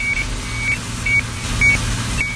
WAREMBOURG Sabrina 2017 2018 distortedsound
With this external sound I tried to create a continuous bug like a scratched CD. For that I had to:
- Importer my outside sound
- Use an effect "amplification so that his son is tenfold
- I used the measuring tool all along the thread so that the effect of the "bug" is constant
- I doubled the sound and I went to editing for the "bug" effect
- For that, I copied pasted all the little pieces where we could hear a noise and I assembled them after others steadily
- Then I deleted parts of my track 1
- I lined up my track 2 to track 1 so that the wires are different but get along at the same time
- Finally, I wanted to create a game in the alternation son for that I put my track 1 on the left ear and my track on the right ear so that throughout the son there is a balance from left to right.
fridge-domestic, repetition-sequence, transformation-reverse